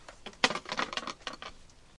Tool Placed On Rickety Table
Hedgeclippers placed upon on a small wobbly wooden table. Anyone can use. Share and share alike.
garden, clippers, Hedgeclippers, hammer, hardware, saw